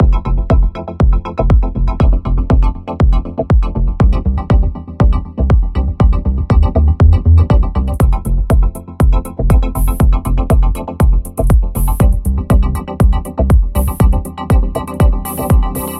Fluffy Song Drop
I was on my way to make a song, but the composition is not what I'm looking for... but maybe it's what you're looking for! This one is just the DROP. Check the other two files 'Fluffy Song Intro' and 'Fluffy Song Loop' to get the full 'song'!
C Major / 120 Bpm
electro, deep, dance, loop, drop, house, beat, happy